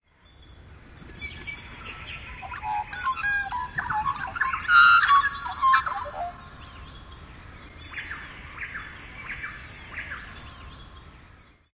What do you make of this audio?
The sound of a magpie in Fryers Forest.
Recorded with a mid-side configuration using a Sennheiser MKH 416 paired with a MKH 30 into my Zoom F4.
bird, Atmos, australia, magpie, field-recording, nature